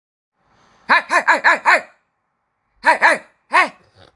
a angry baby bulldog